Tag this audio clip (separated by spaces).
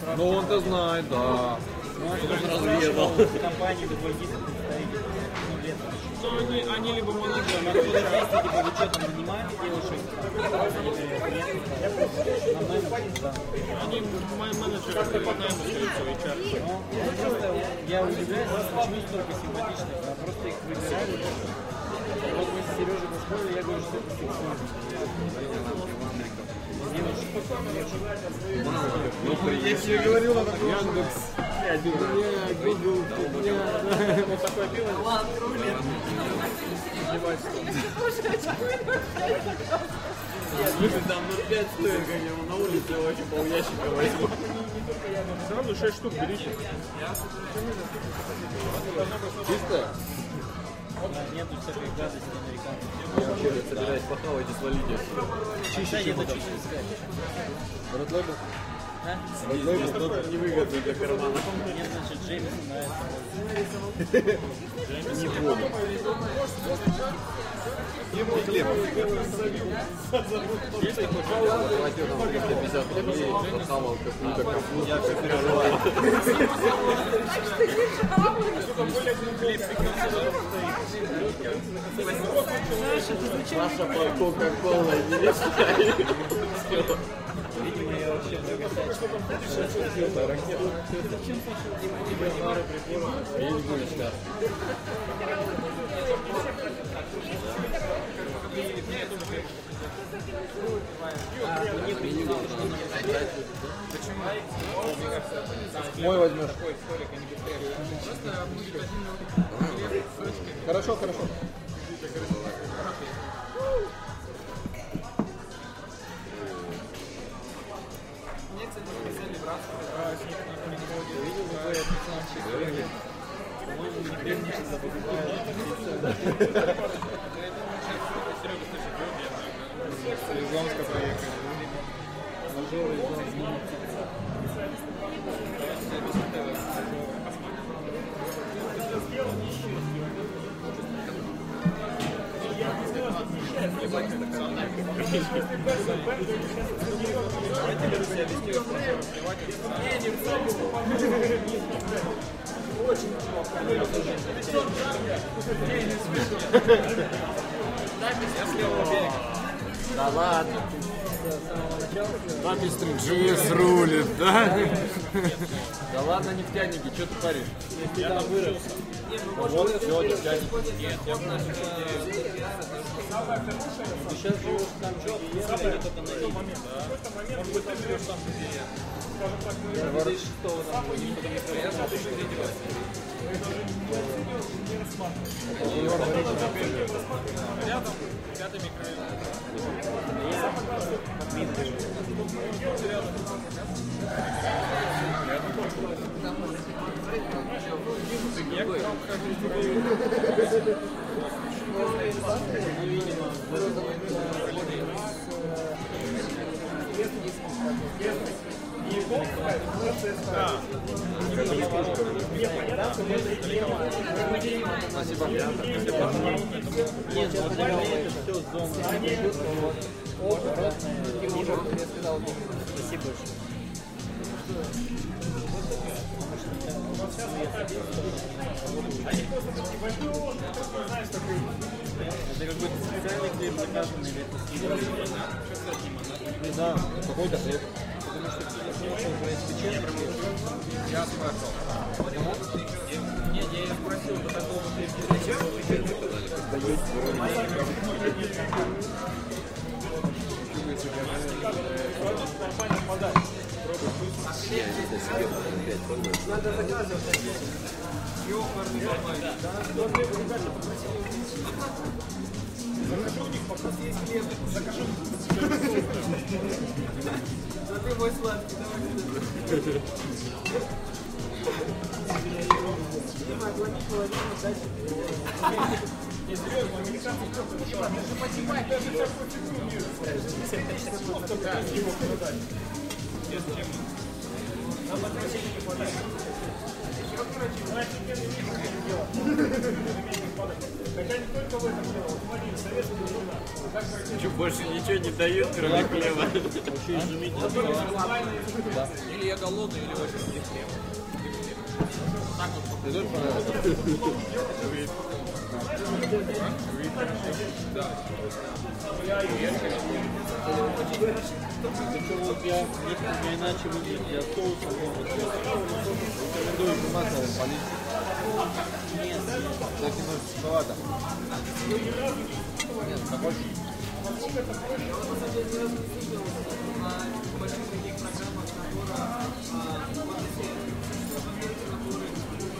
pub friends-company drink restorant eat ambient laughter background-music atmosphere russian-language 2012 party people noise speak russian-speech people-speak russian evening beer rest